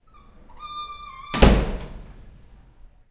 This sound is when someone goes in the bathroom and closes the door.
CS12, upf